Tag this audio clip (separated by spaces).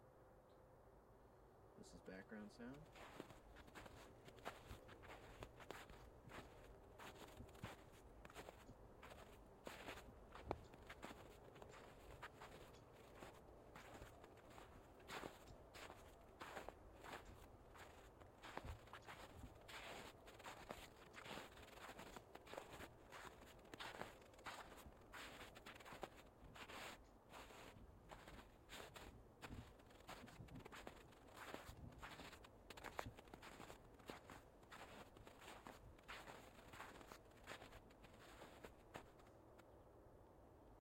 birds feet field-recording foot footstep footsteps snow step steps walk walking